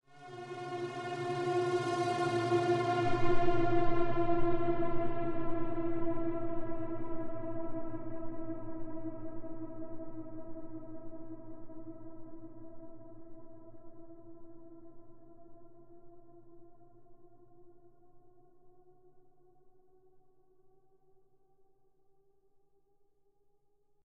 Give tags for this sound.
Machines
Industrial
Alien
Sci-fi
world
Police
Electronic
Sci
Dark
Noise
Future
Fi
Distant
Horn
Futuristic
War
Space
Blade
Runner

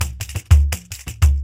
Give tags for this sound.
beat
brasil
pandeiro
samba

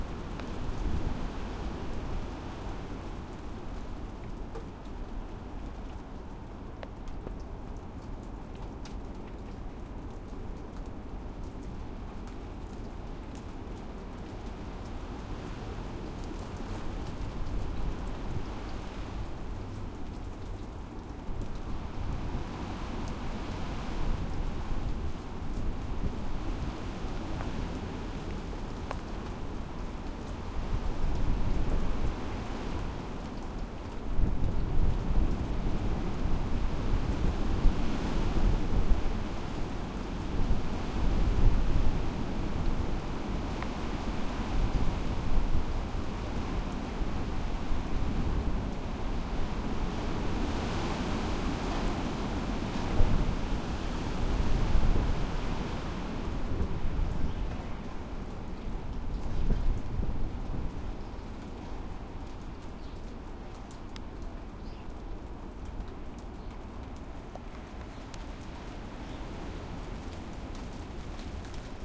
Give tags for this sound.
wind
strongish
trees
backyard
rain

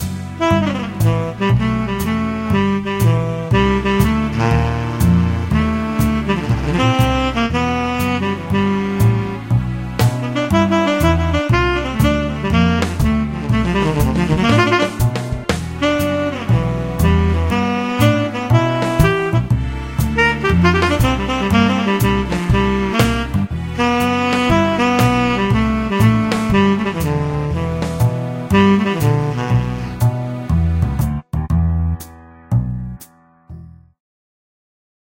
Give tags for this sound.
saxophone-kontakt
tenor-saxophone-vst
soprano-saxophone-vst
alto-saxophone-vst
virtual-saxophone
saxophone-vst
tenor-saxophone-kontakt
saxophone-vst3
baritone-saxophone-vst

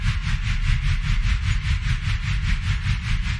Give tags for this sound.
motor
train
car
loopable
big-engine
engine
steam
loop
vehicle

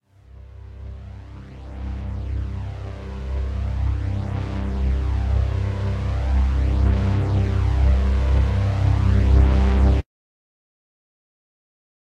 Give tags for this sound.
Intro
Synth

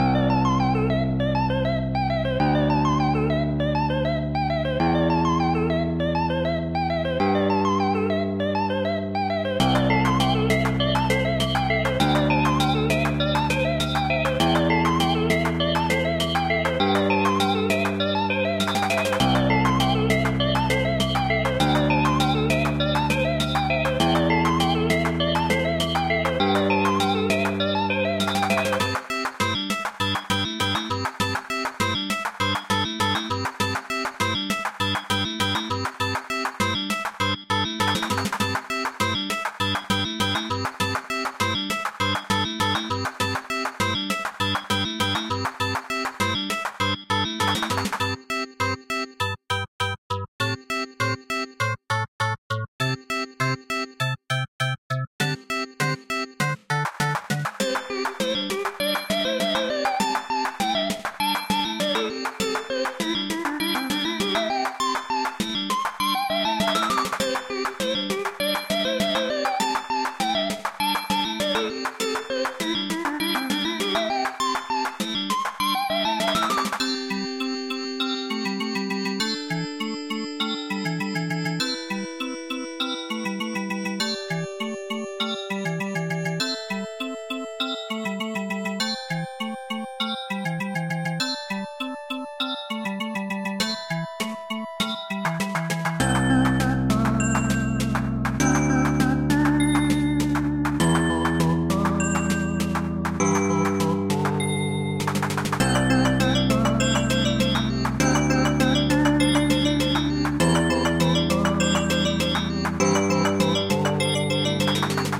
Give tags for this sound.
adventure
atmosphere
background
calm
cartoon
droll
electro
electronic
funny
game
loop
melody
movie
music
ost
relaxing
sample
soundtrack
theme

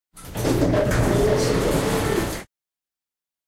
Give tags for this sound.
arrive
building
lift
machine
move

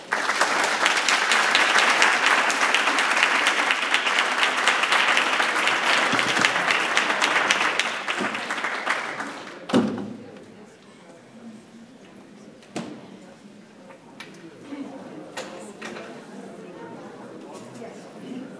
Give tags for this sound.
applause
audience
auditorium
clapping

